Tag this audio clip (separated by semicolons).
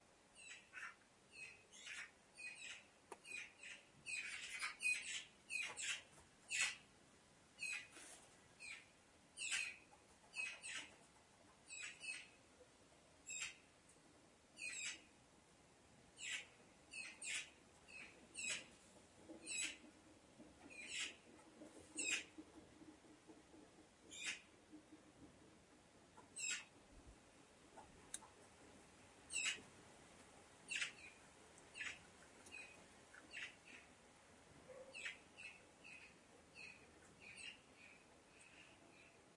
birds; Netherlands; tjirping